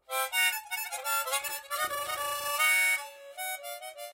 Chromatic Harmonica 1
A chromatic harmonica recorded in mono with my AKG C214 on my stairs.